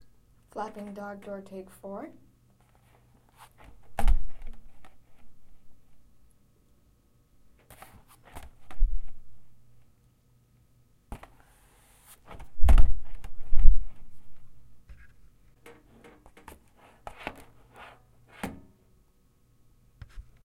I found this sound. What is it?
slamming dog door
dog, door, slamming